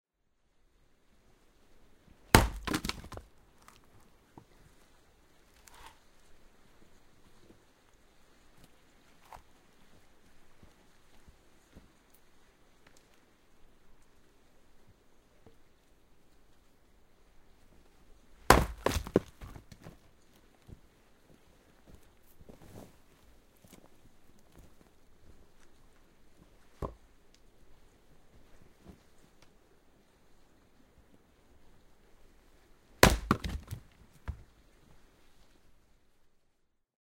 splitting logs
a recording from the sound library of Yellowstone national park provided by the National Park Service